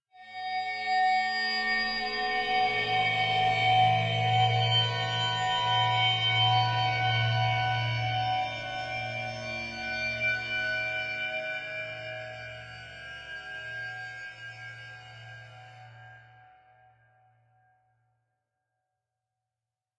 Horror Cinema 11 2014
Originally composed with an Alesis Ion, it consists mainly of three metallic sine waves with reverb, delay and a lot of detuning. Processed through Fluid V 1.5, Absynth 5 and Alchemy for a small touch up. With a few additional sound effects to add.
Horror, Foreboding, Creepy, Sci-fi, Film, Digital, Atmosphere, Ambient, Synthetic, Processed, Dark, Cinematic